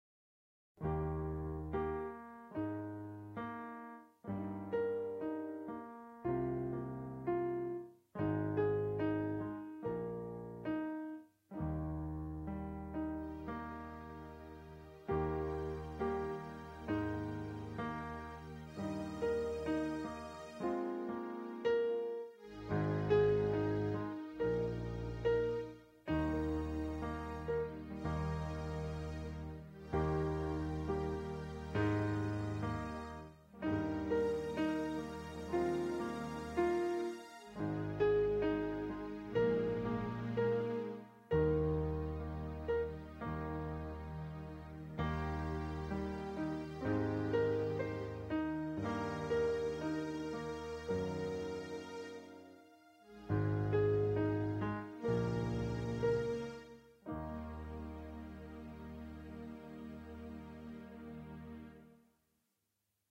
amanecer chorus
Simple ballad style piano over keyboard chorus mixed through Audacity
Movie, Keys, Ballad, Simple, Interlude, Background, Piano